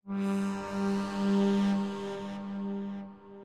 PsyG2lowspace
A sci-fi space style sound in a low tone.
deep, futuristic, horror, low, psy, sci-fi, scifi, space